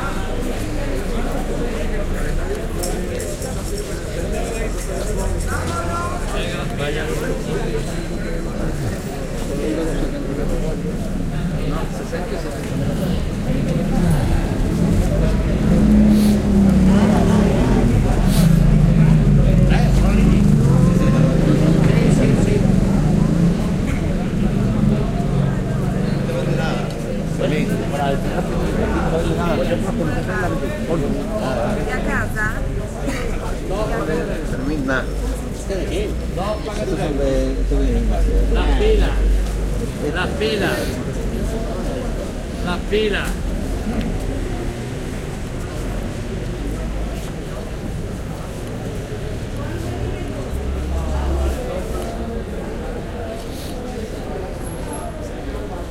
Fleamarket at Feria St (known also as 'El Jueves') in Seville, voices speaking in Spanish. Recorded during the filming of the documentary 'El caracol y el laberinto' (The Snail and the labyrinth) by Minimal Films. Shure WL183 into Olympus LS10 recorder.